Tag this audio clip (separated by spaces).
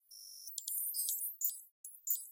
army beep beeps clicks computer dashboard data effect keyboard reveal sound type typing